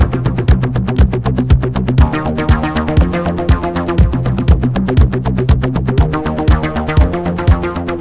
NightRide-powerful-arpeggiated-bassline
techno, synth